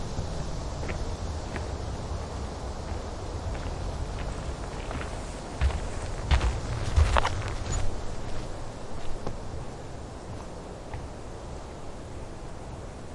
Steps On Ground Summer Forest
A set of different field recordings in des summer in Saxony (Germany). Wind, Forest, Leaves, Bees, Birds
Hope you find something for your project
forest, summer